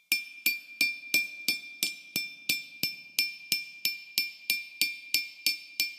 masse : groupe nodal 'percussion, résonance du verre)
timbre harmonique : éclatant
grain : texture lisse
Allure : silence entre chaque percussion du crayon)
dynamique : attaque violente
profil mélodique : variation scalaire
calibre : dynamique et reverb